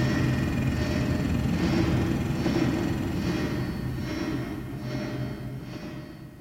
this is a drone i made (it has quivers in it, though) to simulate
someone breathing and shivering; made with Adobe Audition and Native
Instruments Reaktor
hook, electronic, drone, sustained, processed, loop